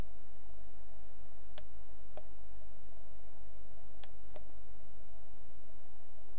Monitor button
button, press, real